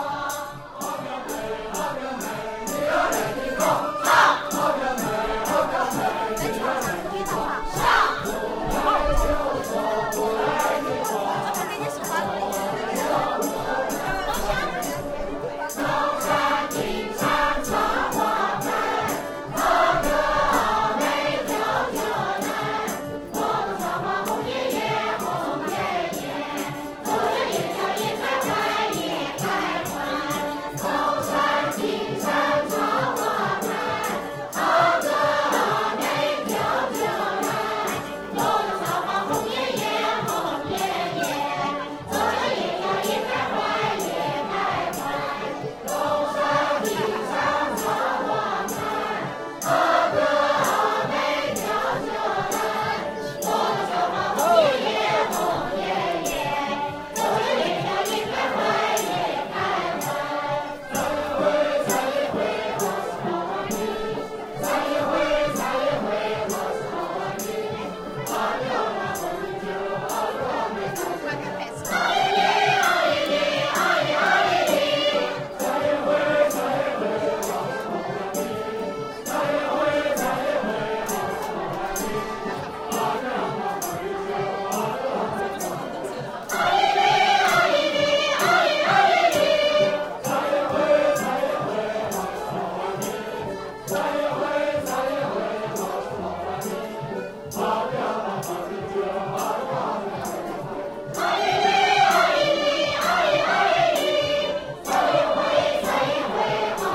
Public singing in China
This is a song sung by a group of (old) people in a park in Kunming, China. Recorded with Sony PCM-D50
china, community, song